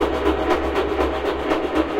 convoluted bare bone loop 60 bpm 017
This is loop 7 in a series of 135 loops that belong together. They all have a deep dubspace feel in 1 bar 4/4 at 60 bpm and belong to the "Convoloops pack 02 - bare bone dubspace 60 bpm" sample pack. They all have the same name: "convoluted bare bone loop 60 bpm"
with three numbers as suffix. The first of the three numbers indicates
a group of samples with a similar sound and feel. The most rhythmic
ones are these with 1 till 4 as last number in the suffix and these
with 5 till 8 are more effects. Finally number 9 as the last number in
the suffix is the start of the delay and/or reverb
tail of the previous loop. The second number separates variations in
pitch of the initial loop before any processing is applied. Of these
variations number 5 is more granular & experimental. All loops were
created using the microtonik VSTi.
I took the bare bones preset and convoluted it with some variations of
itself. After this process I added some more convolution with another
60-bpm, deep, dub, dubspace, loop, space